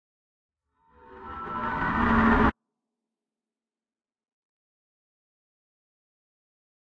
mid, uplift, 3, soundhack, ableton-live, maxmsp, granular, time-stretching, phase vocoding
granular, time-stretching, vocoding, processed, ableton-live, 3, maxmsp, cinematic, mid, uplift, soundhack, phase